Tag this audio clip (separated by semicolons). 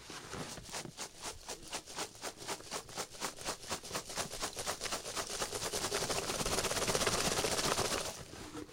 packing-peanuts; peanuts; shaking; box; styrofoam-peanuts